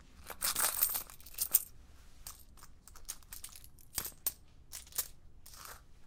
Searching for a coin in a purse
coin, purse, search